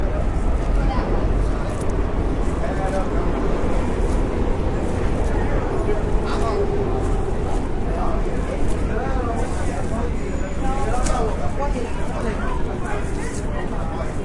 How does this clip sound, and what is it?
Subway Platform Noise with Distant Passing Train
new-york, nyc, city, station